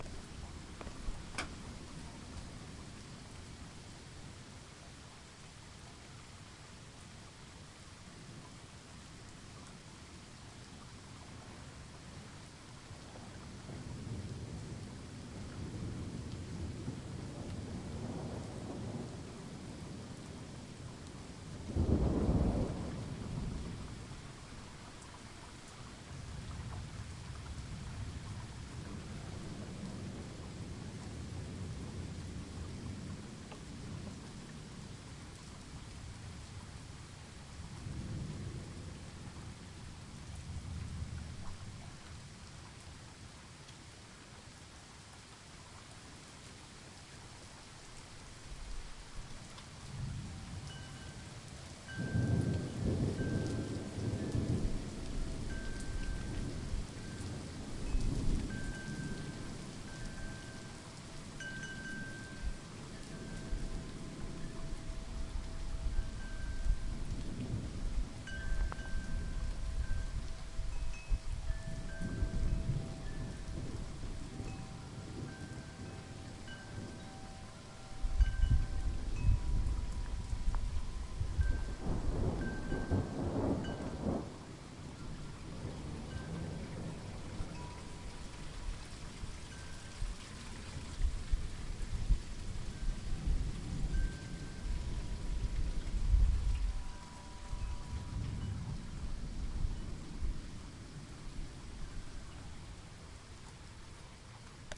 Thunderstorm recorded in Oklahoma 9/2011
field, rain, recording, thunder, weather